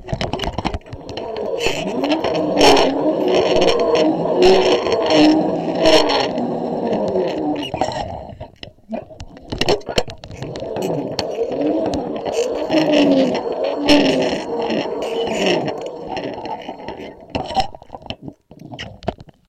sound of a zip-line from the pulley.
Contact Mic
Zoom H2
contact, line, mic, tirolina, zip, zipline